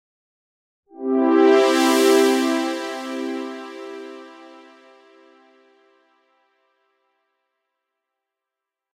Really cool blaring brass sound.